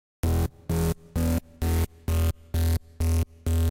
130 BPM Bass
This is bass sound created in Spire and processed using third party plugins and effects.
Loop, Bass, Music, Trance, Sample, 130-BPM